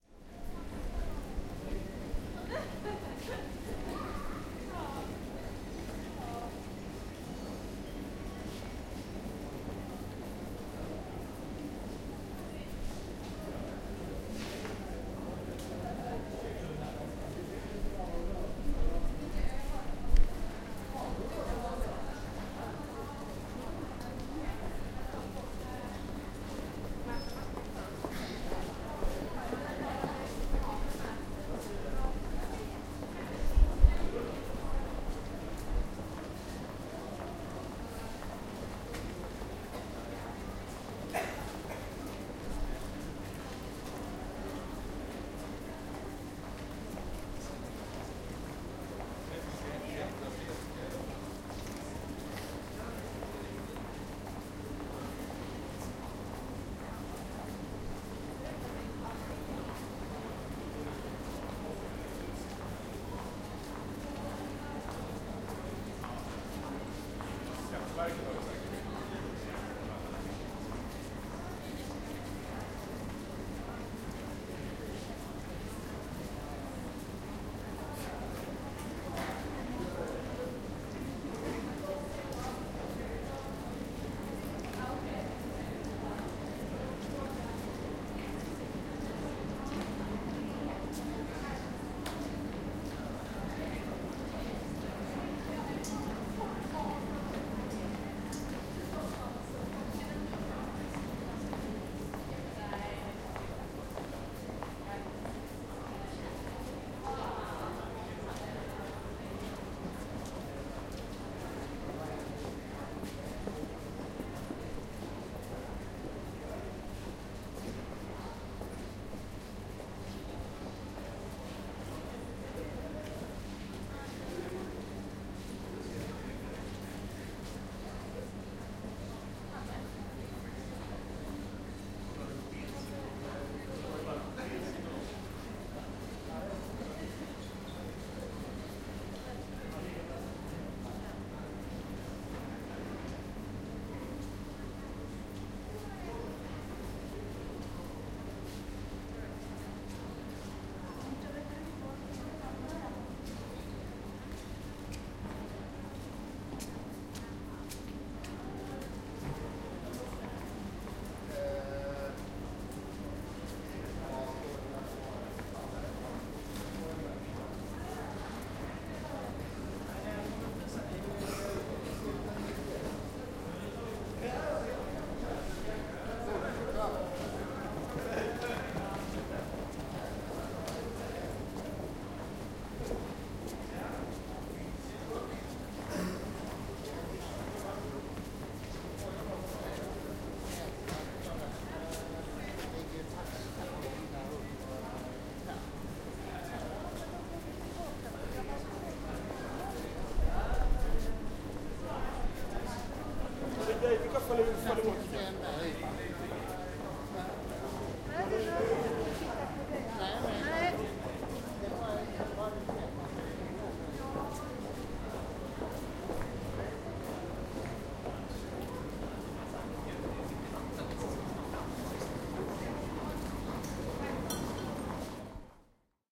Train station ambience.
A long recording of people passing through the arrival hall of Malmö Central Station in Malmö, Sweden.
ambience, movement, people, foley, train, Station, culture